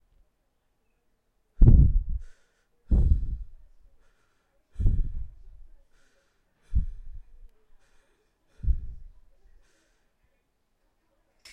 vocals heavy breathing
Heavy breathing from a male
VFX, OWI